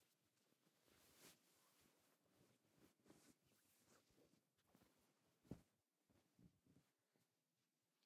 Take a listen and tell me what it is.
Pillow Fixing Edited
This is of someone changing the case on a pillow and moving the pillow around softly.
basic, Cotton, free, gentle, Linen, movement, OWI, personal, Pillow, Pillow-Case, shifting, shuffling, Soft, Subtle